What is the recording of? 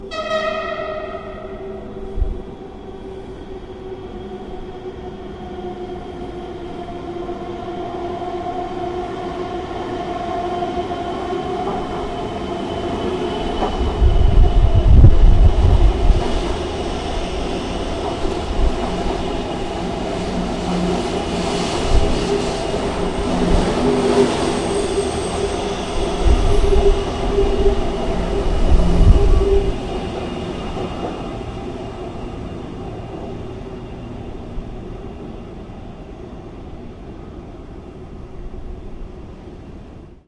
An "Out of Service" subway train passing the platform without stopping. Dimmed lights & empty. (A bit creepy in a crowded & busy city like Taipei.) Raw.